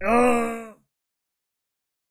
argg-pitfall
arg, death, falling, grunt, hurt, painfull, pit, pitfall, screaming, yell